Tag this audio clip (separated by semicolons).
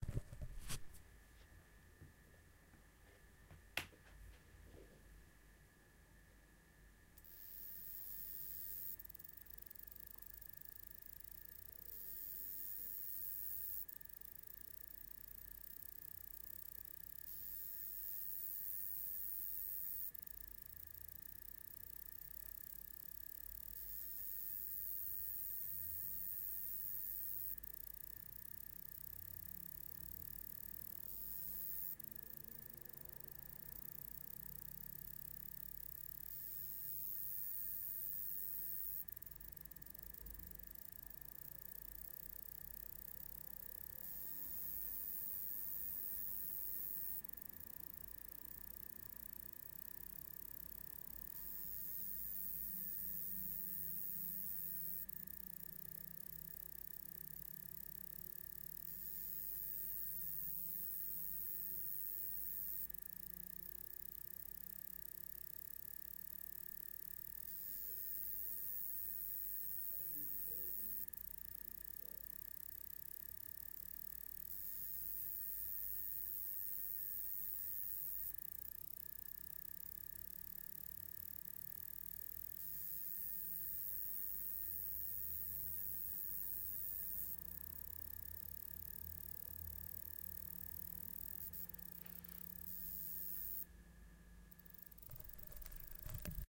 bug; chirp; cricket; insect; night